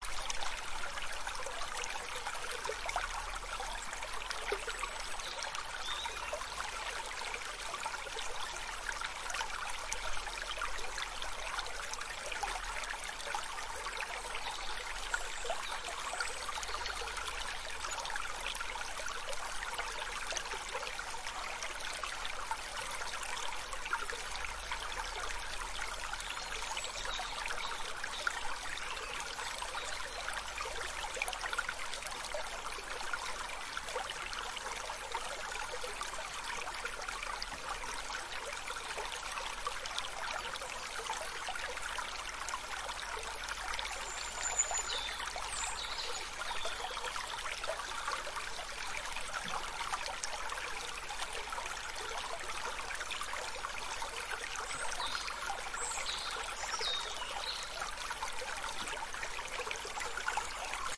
Summer forest brook
A summer brook in a forest in central Europe. With some birds as well but the water running down little rocks and stones dominates.
brook, summer